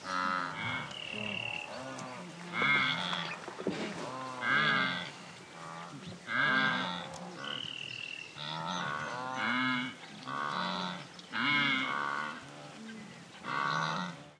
Gnous-En nombre+amb
A lot of wildebeests during their migration in Tanzania recorded on DAT (Tascam DAP-1) with a Sennheiser ME66 by G de Courtivron.
tanzania, africa, gnu, wilbeest